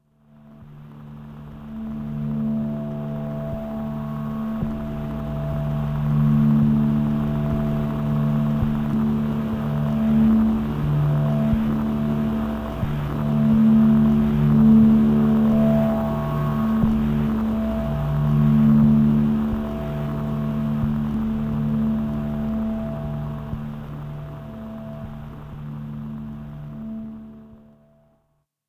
Scifi Synth Drone 305
From series of scifi effects and drones recorded live with Arturia Microbrute, Casio SK-1, Roland SP-404 and Boss SP-202. This set is inspired by my scifi story in progress, "The Movers"
arturia, casio, drone, dronesound, microbrute, noise, roland, sk-1, sp-202, sp-404, synthesizer